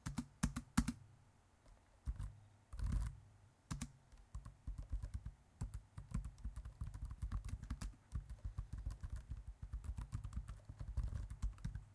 Typing Laptop Keyboard 3
Computer, Zoom, Recording, H1, Laptop, Typing, Stereo, Keyboard, Macbook